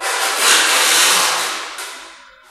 Bonks, bashes and scrapes recorded in a hospital at night.
hit, hospital, percussion